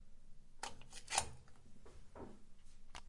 Wall plug, electric socket
Cable head being plugged in to the socket.
Part of Martina's Evening Routine pack.
Recorded with TASCAM DR-05
Signed 16 bit PCM
2 channels
You're welcome.
high-quality, socket, electric, electricity, evening, wall-plug, bathroom, plug, hq, hairdryer, plugging, power, cable, hygiene, household-appliance